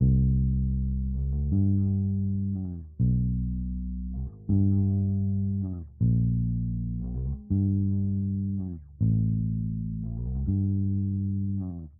Dark loops 004 bass version 2 wet 80 bpm
piano, 80, loop, 80bpm, bass, loops, dark, bpm